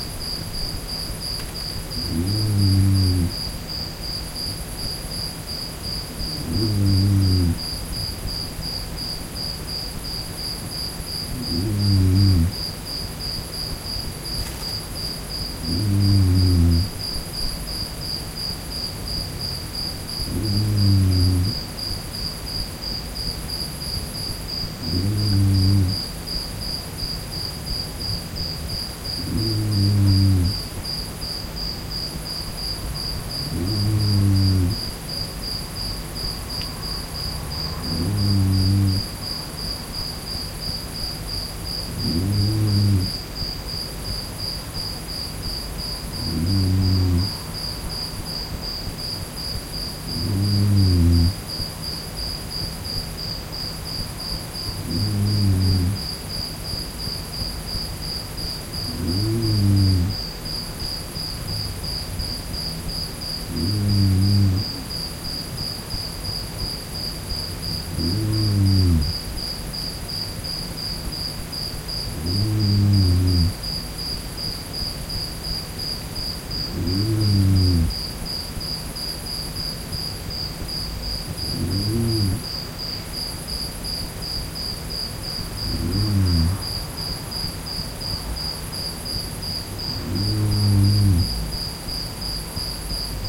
snore snoring night ambient crickets bugs white noise
ambient, bugs, crickets, night, noise, snore, snoring, white